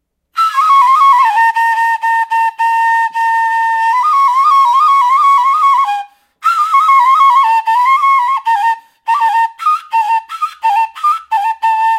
music greek flute rec in my home studio
delta, flute, greek, iek, skaros